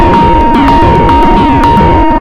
110 bpm FM Rhythm -52
A rhythmic loop created with an ensemble from the Reaktor
User Library. This loop has a nice electro feel and the typical higher
frequency bell like content of frequency modulation. A harsh overdriven
loop. The tempo is 110 bpm and it lasts 1 measure 4/4. Mastered within Cubase SX and Wavelab using several plugins.
110-bpm, electronic, fm, loop, rhythmic